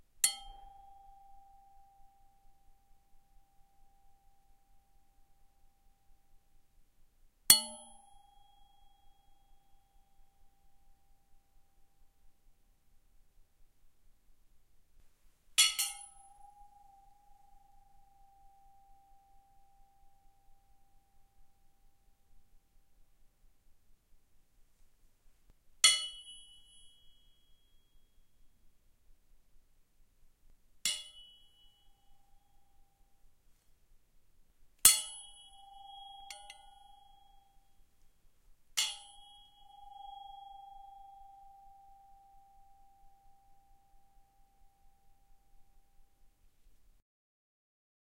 METLImpt Sai Weapon Foley Long Ring, Harmonics
I recorded my Sai to get a variety of metal impacts, tones, rings, clangs and scrapes.
impact, metal, strike